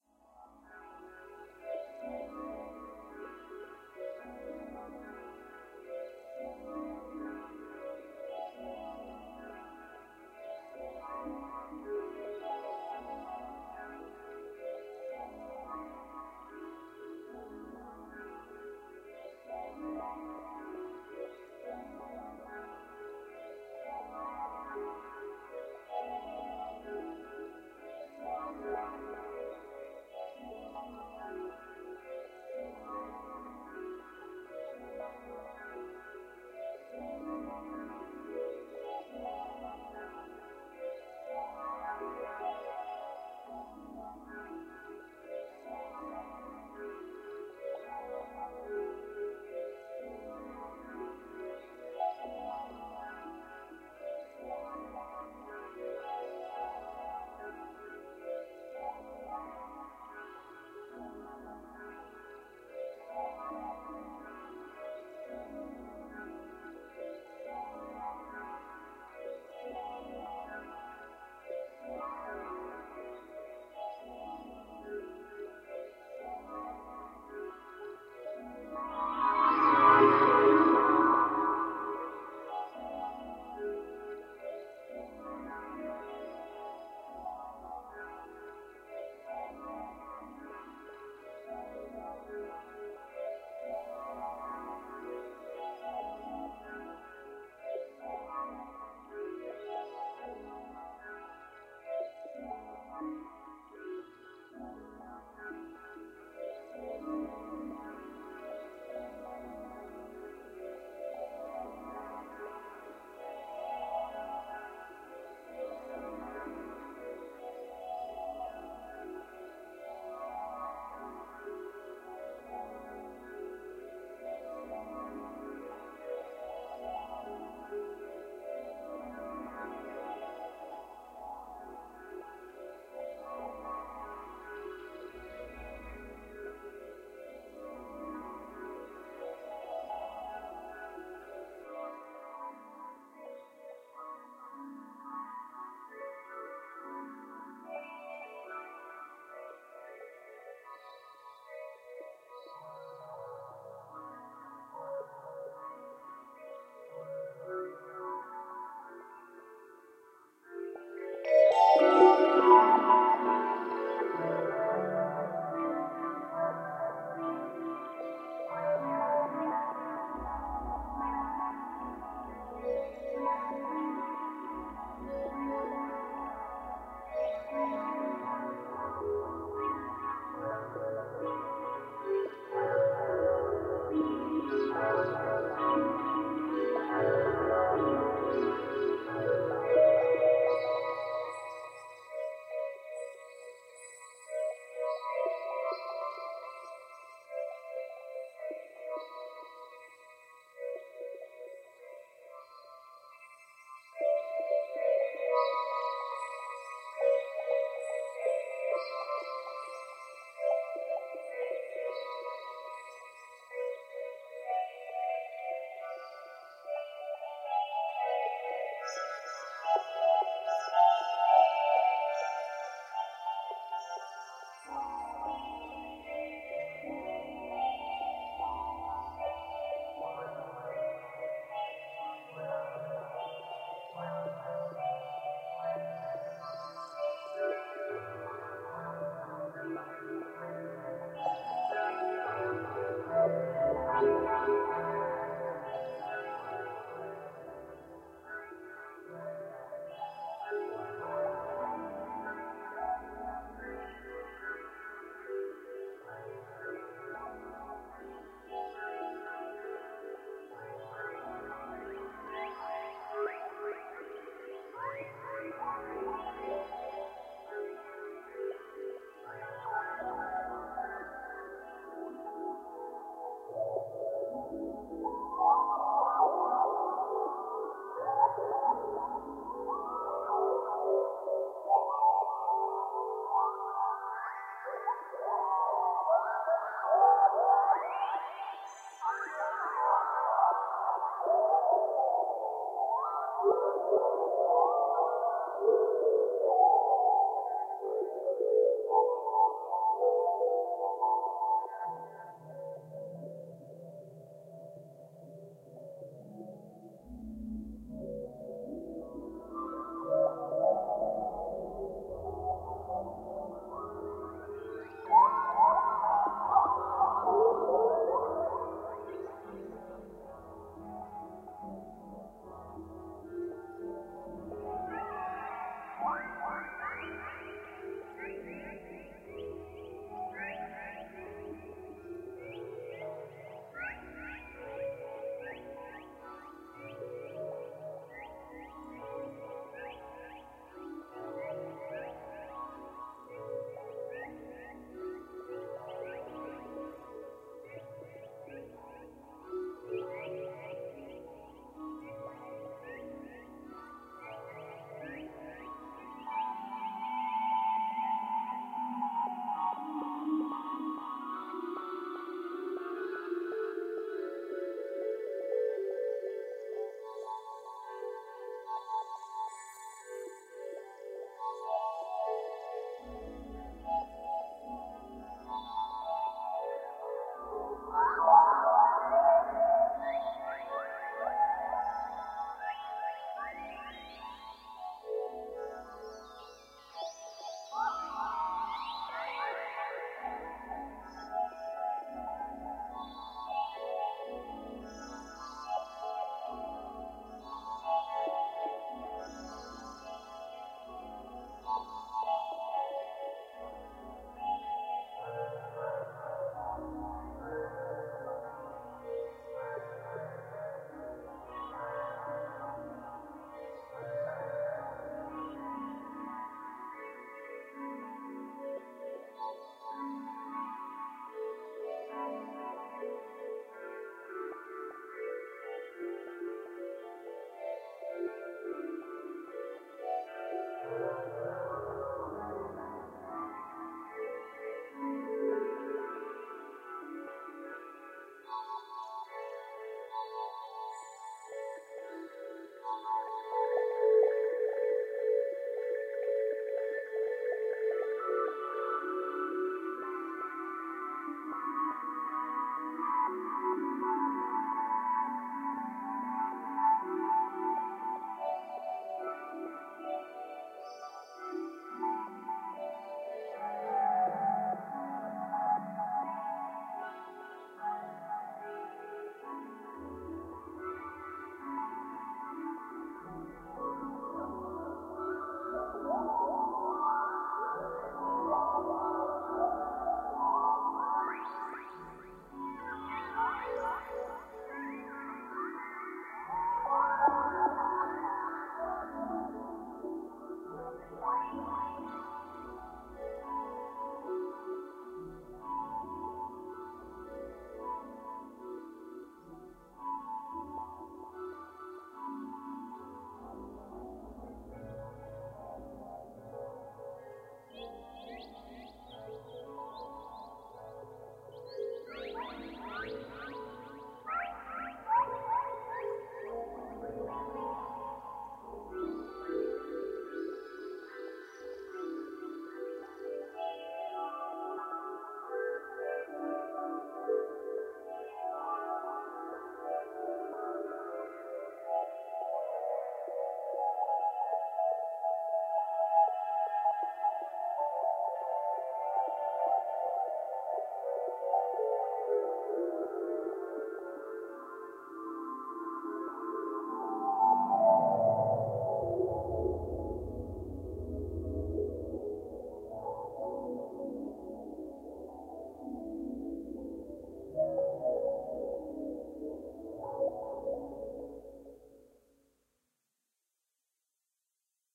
Soundscape Karmafied 01
Made with Korg Karma.